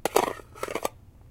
Mettle-on-mettle scrape on the lid of a common brand of breath mints.